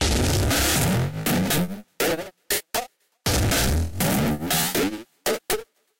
MOV.Beat 1
Computer beat created by Reason and edited in Logic 6 with a noise distortion and pitch modifier